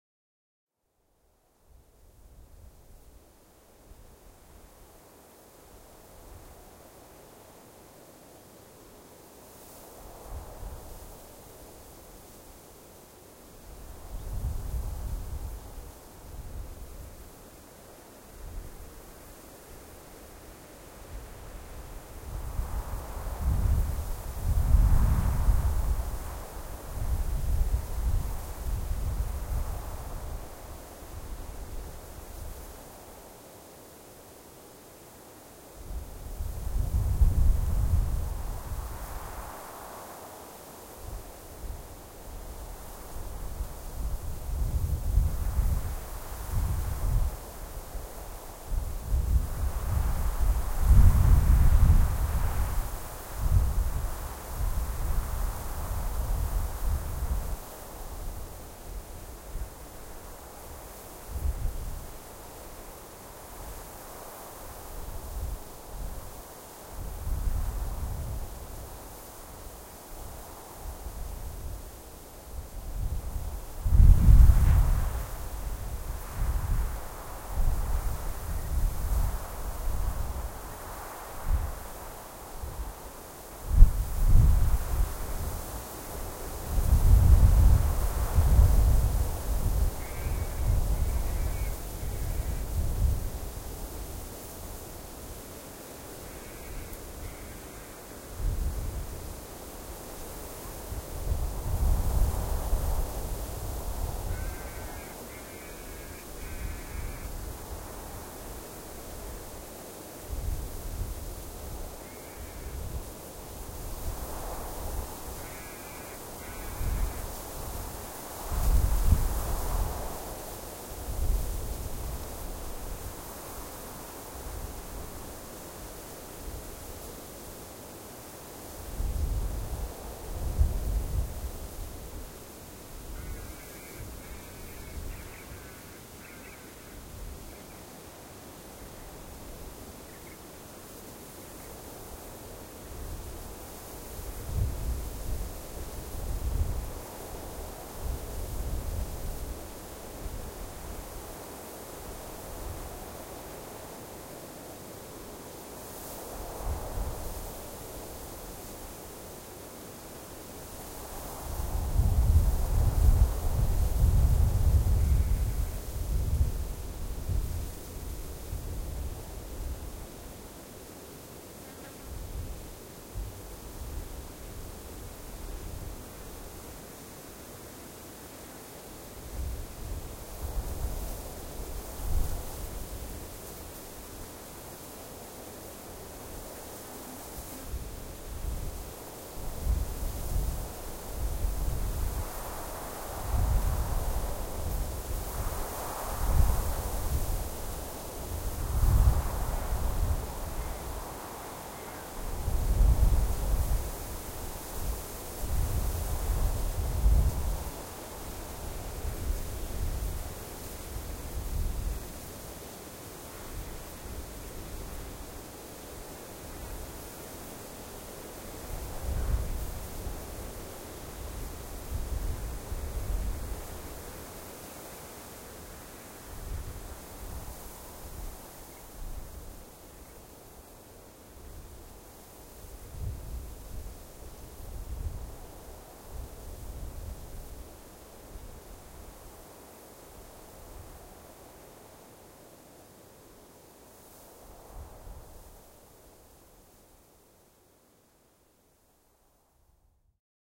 Desert wind whispering through the spinifex!
Recorded during the daytime at a sacred site on Warlpiri country.
MS stereo with sennheisser mics.
This recording is as it came No equalisation.
I have another version available that i have "cleaned up" with some EQ available here...
Enjoy